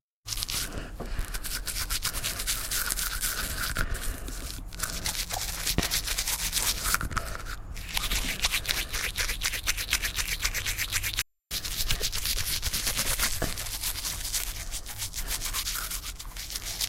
Recorded in 2004 in Thailand with a microphone on minidisc